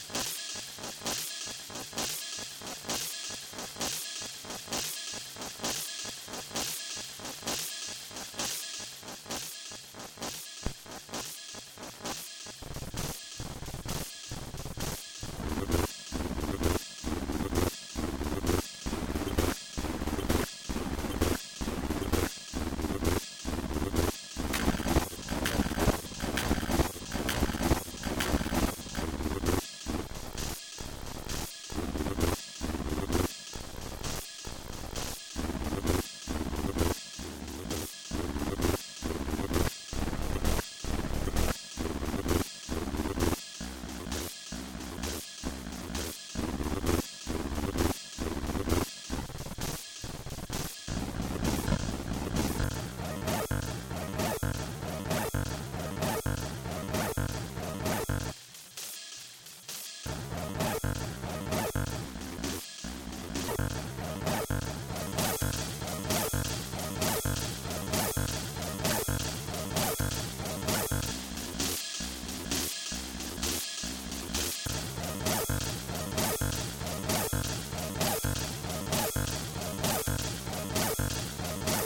one shot acid loop
Loop, Techno, Acid, shot